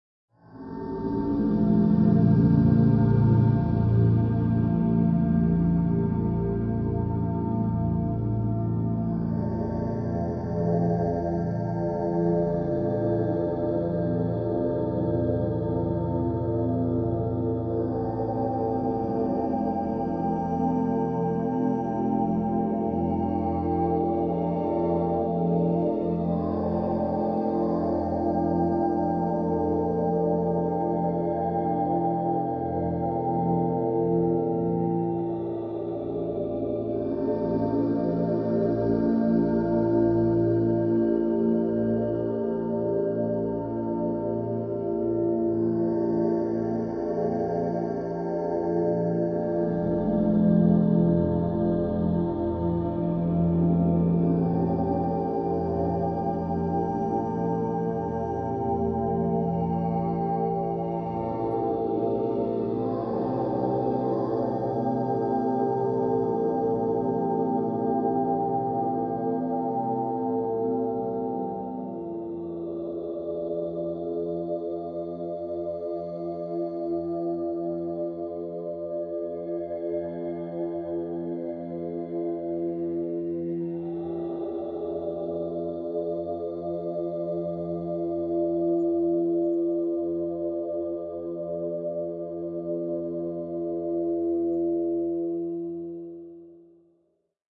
ohm sing cl2 cut2 ms st
Simple, single "Ohm" chant sample by my uncle, processed in Max/MSP (quite basic sample-player-, filterbank-patch) as experiments for an eight-speaker composition.
ambient, chant, chill, comb, comb-filter, drone, low, meditation, ohm, processed, relaxed, tibetan-chant, vocal